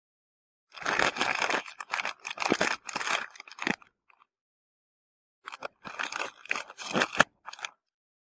Store Crinkling Bag2
ambience, can, checkout, clink, clunk, cooling, crinkle, food, produce, store